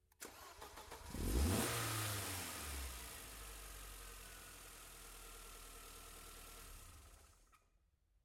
Clip featuring a Mercedes-Benz 190E-16V starting and giving a big rev. Mic'd with a DPA 4062 taped to the radiator support above the driver's side headlight.
engine, vroom